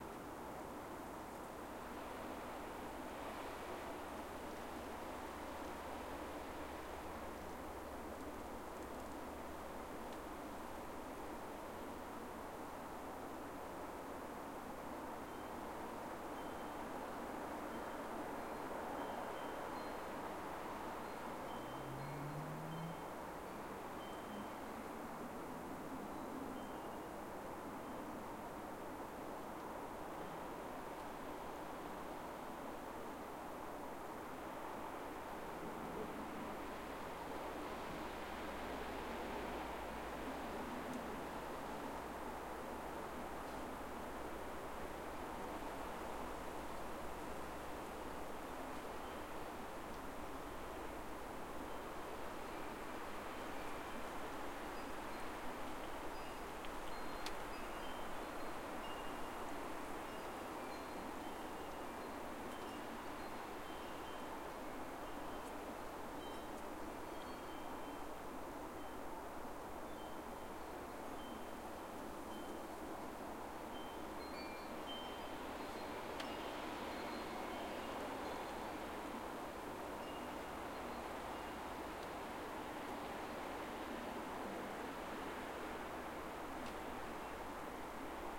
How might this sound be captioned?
Wind Chimes1
Leaves on a tree hissing in strong wind, windchimes
tree, windchimes